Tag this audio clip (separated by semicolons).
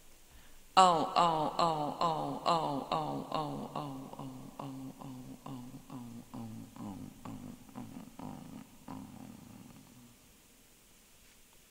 voice; free; sound; sample; vocal